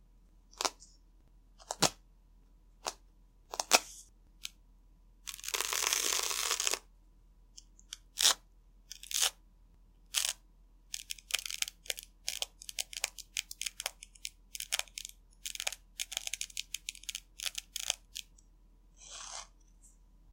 A velcro wallet ripping and rubbing on itself
click, effect, foley, fx, interaction, object, rip, sfx, sound, sound-effect, soundeffect, velcro